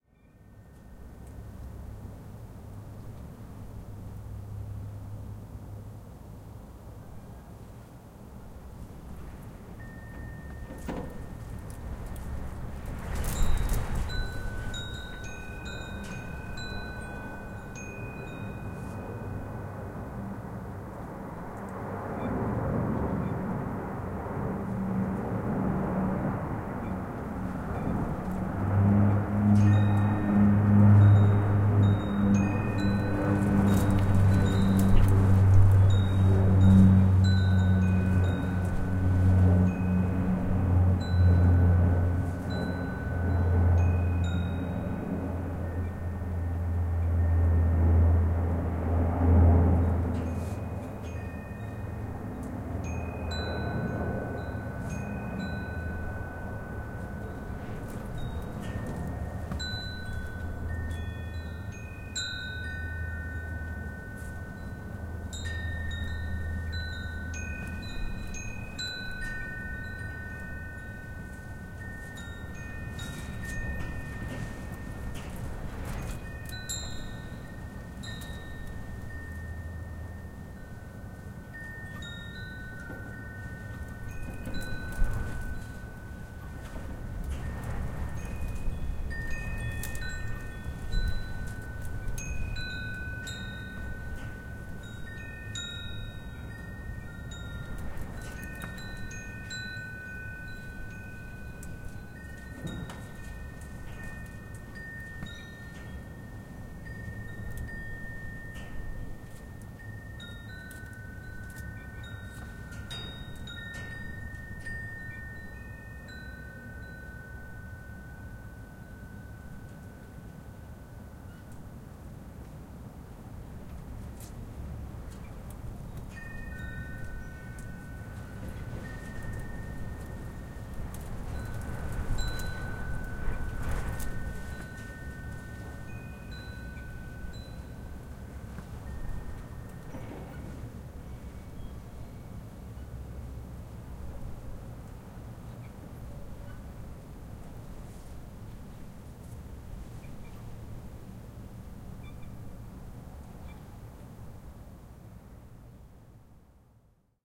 night wind 290312
29.03.2012: 12.30 a.m. Gorna Wilda street in Poznan/Poland. windy night in the balcony. Sound of blast of wind, dancing small-bells and flying over plane.
recorded from the balcony ground level. zoom h4n, no processing
small-bell, wind